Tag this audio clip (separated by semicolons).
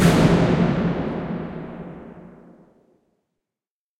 field-recording; hit; percussive; metal; drum; metallic; industrial; staub; percussion